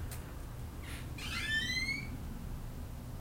Created with image synth or maybe it's a door creaking.